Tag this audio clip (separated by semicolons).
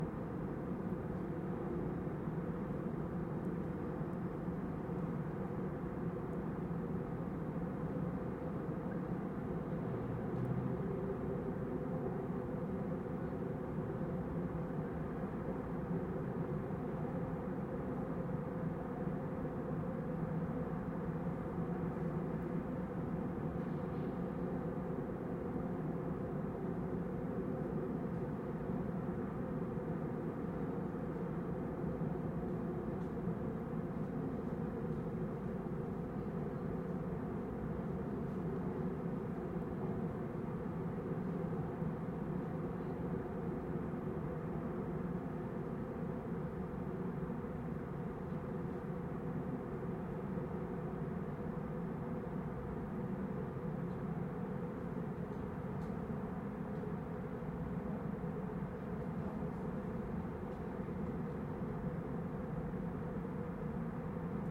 ambiance; distant; urban; atmos; tone; background-sound; field-recording; cityscape; noise; general-noise; city; background; atmosphere; atmospheric; soundscape; ambient; white-noise; ambience; atmo